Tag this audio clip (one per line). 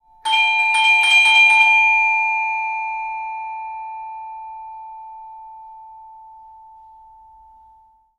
bell
door
doorbell
ringing
rings